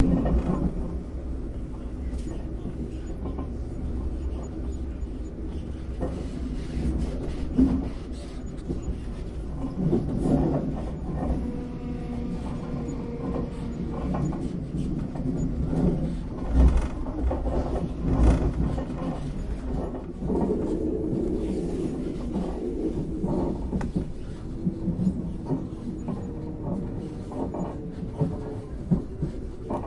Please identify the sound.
Cartilage with rubber
Processed - artificial - texture/ ambiance of mellow rubbing, screwing and squeaking noises.
artificial, experimental, industry, low, manufacturing, rubber, soundscape